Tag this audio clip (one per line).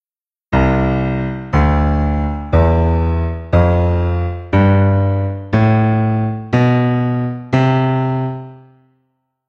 piano
c
scale
major